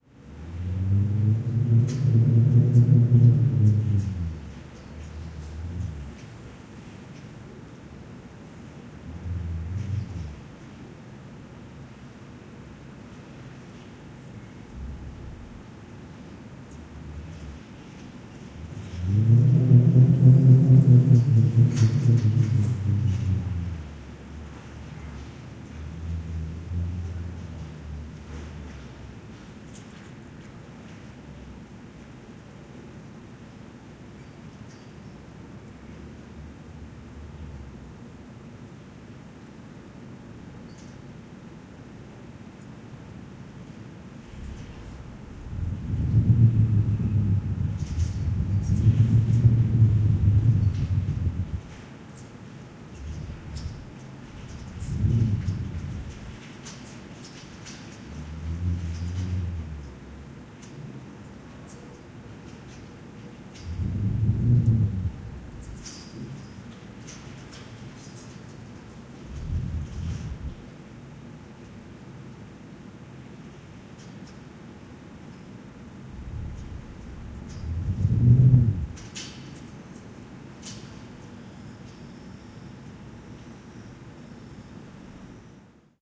Wind howls and moves things. EM172 Matched Stereo Pair (Clippy XLR, by FEL Communications Ltd) into Sound Devices Mixpre-3 with autolimiters off